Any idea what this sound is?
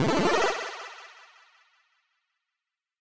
Synth chiptune 8 bit ui interface 2
8, interface, ui, bit, chiptune